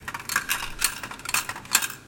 Coin drop change in a vending machine.